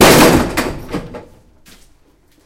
One of a pack of sounds, recorded in an abandoned industrial complex.
Recorded with a Zoom H2.
clean, industrial, city, percussive, urban, metallic, field-recording, percussion, metal, high-quality